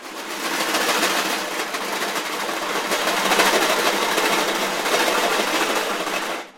aluminum cans rattled in a metal pot
aluminum, cans